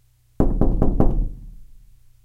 Four sharp knocks on bedroom door2
Four rather serious knocks on a small bedroom door. This one doesn't engage the frame properly either, thus causing it to rattle when I do this.
authority, door, heavy, knock, knocks, loud, rattle, serious, sharp, slow